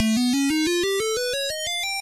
Arcade Sound FX.